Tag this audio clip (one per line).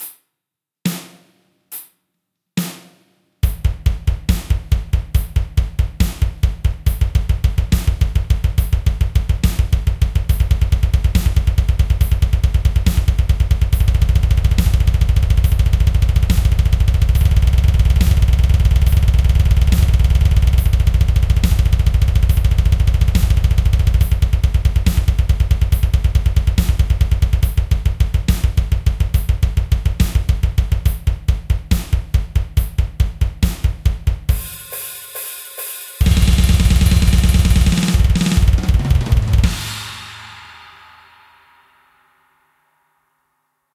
drumkit-from-hell
double-bass
samples
george-kollias
drums
drum-samples
death-metal
extreme-death-metal
drum
blastbeat
intense-metal-drumming
ezdrummer